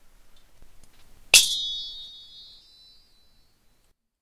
Swords Clash 4
*Ting Ting* :D use for whatever you'd like